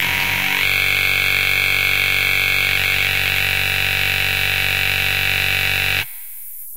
A battery power supply --> guitar pickups.